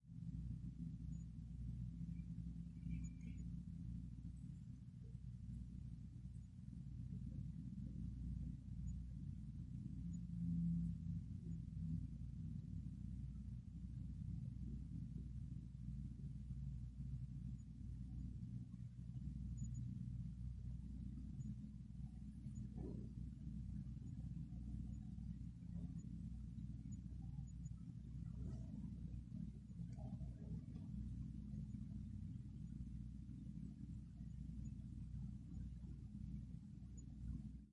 Indoor Environment Factory